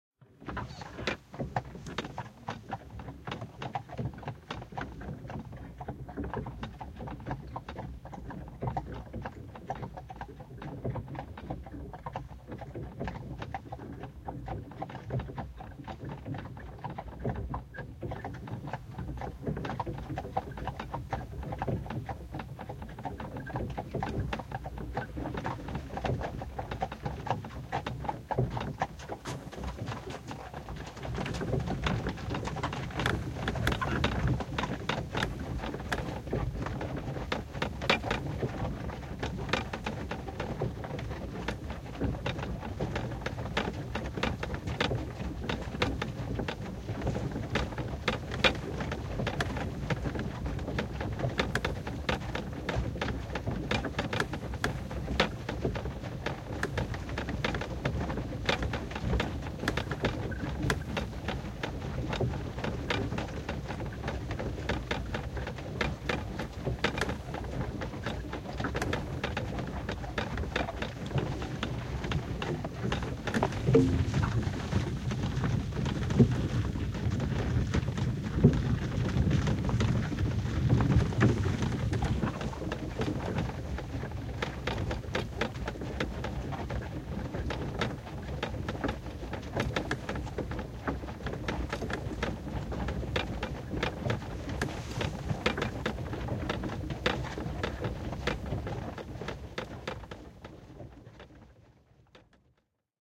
Horsewagon int start:drive slowly:stop
Horsewagon from 18th century
drive, horse, interior, start, stop, wagon